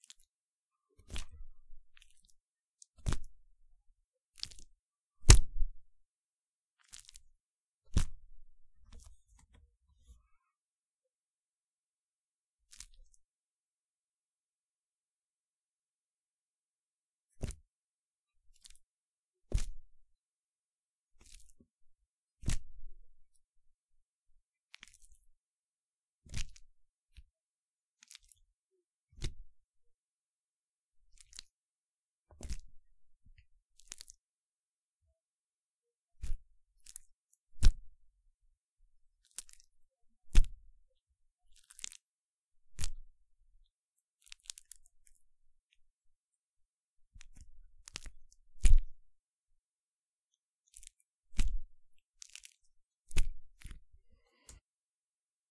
A banana being squished to sound like drops of wax. It could work well for blood and gore too. Sounds nice and viscous. Zoom h6.
Composer and Sound Designer.